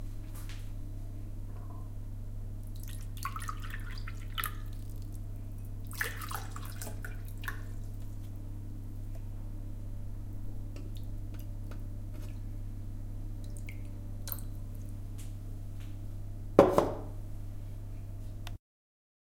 pouring waterII
Slowly pouring water into glasses.
liquid, pour, pouring, splash, water